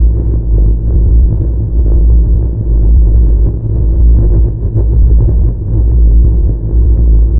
Deep Dark Drone - A
Sample from my latest free sample pack. Contains over 420 techno samples. Usefull for any style of electronic music: House, EDM, Techno, Trance, Electro...
YOU CAN: Use this sound or your music, videos or anywhere you want without crediting me and monetize your work.
YOU CAN'T: Sell them in any way shape or form.
ambiance, dance, dark, deep, drums, EDM, effect, electronic, free, hard, horror, house, Kick, loopmusic, loops, oneshot, pack, sample, sound, Techno, trance